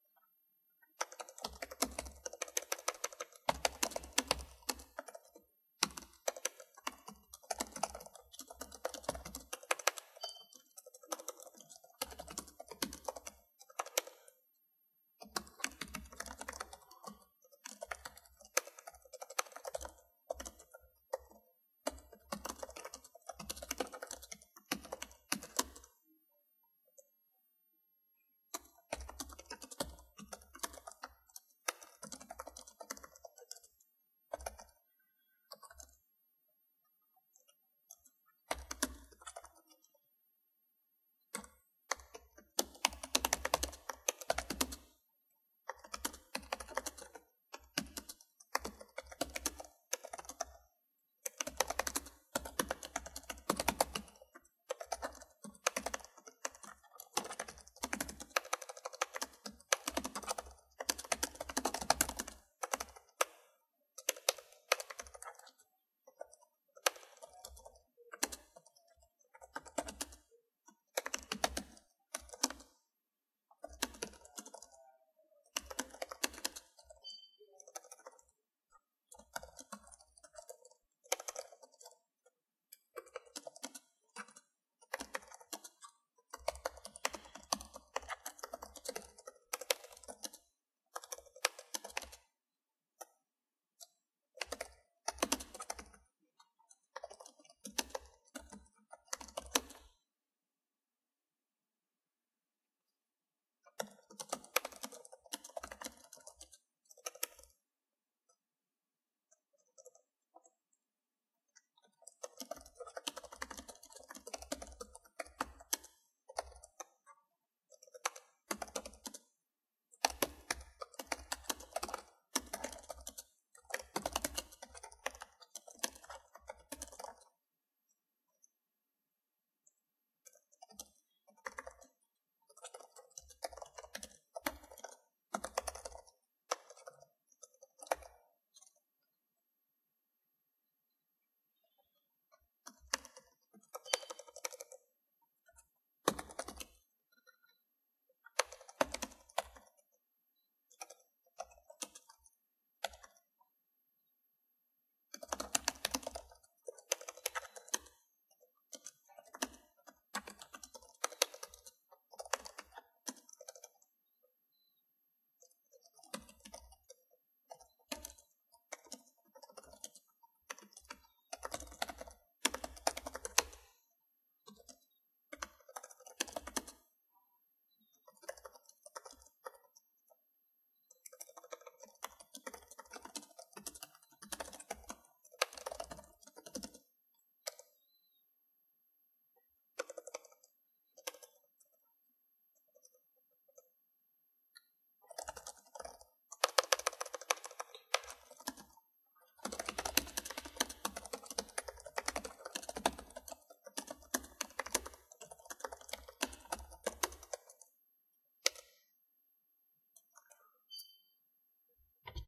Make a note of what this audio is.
Keyboard typing
Typing on a keyboard